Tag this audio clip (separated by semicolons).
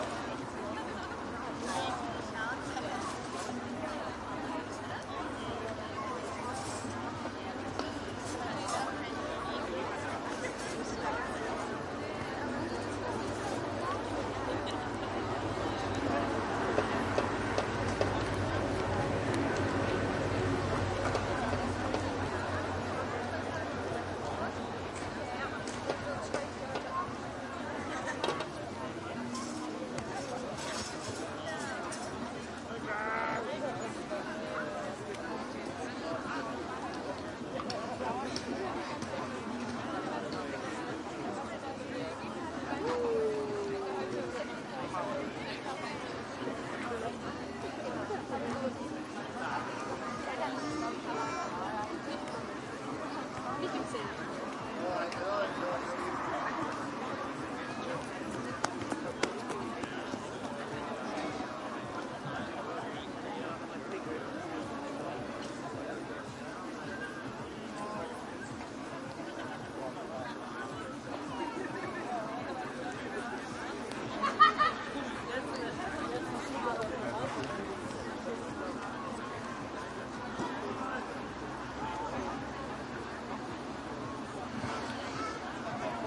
outdoor; community; crowd; medium